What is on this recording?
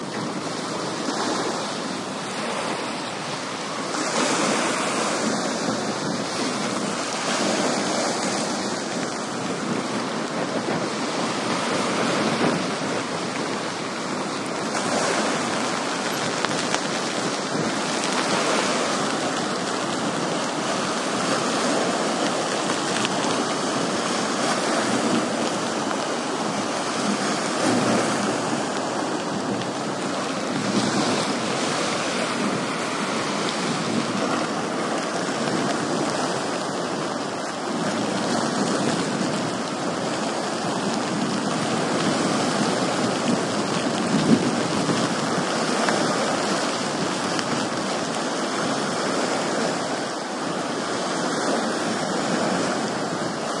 20130406 nasty.weather.09
noise of rain and wind, with waves splashing in background. Recorded at Puerto Toro, near Serrano Glacier (Natales, S Chile)
wind, surf, rain, field-recording, waves